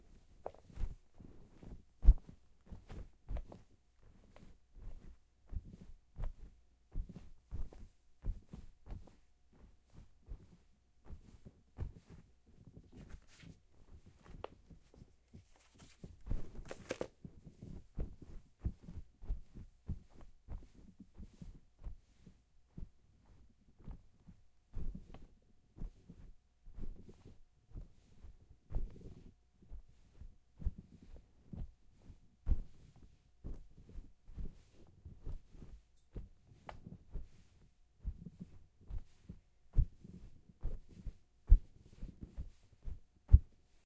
walk carpet
Walking on carpet in shoes
carpet, feet, floor, foot, footstep, footsteps, shoes, soft, step, steps, walk, walking